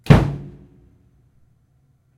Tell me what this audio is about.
BASS DRUM 2 1-2
bass, drum, kit